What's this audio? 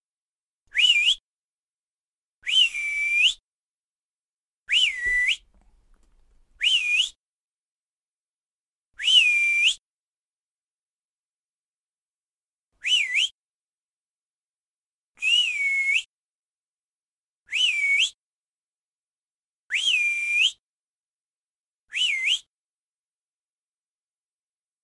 come here whistle
A whistle to tell someone where you are, for someone to hurry up, to call a dog. Very simple.